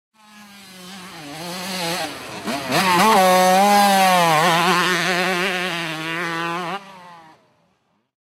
KTM65cc-turn2jump
ktm65 turning into a jump on mx track
65cc, ktm, motorbike, motorcycle